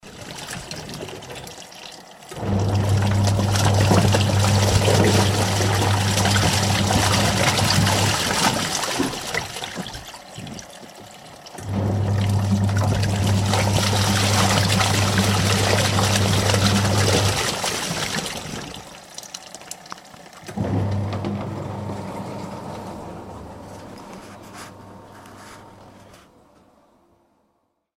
Washing Machine 1
Home, Kitchen, Machine, Room, Washing, bath, bathroom, domestic, drain, drip, dripping, drying, faucet, mechanical, running, sink, spin, spinning, tap, wash, water